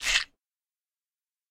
Knife Stab
Foley recording of a knife grinding on a whetstone combined with squishing a tomato.
attack, fight, flesh, knife, squish, stab, stabs, struck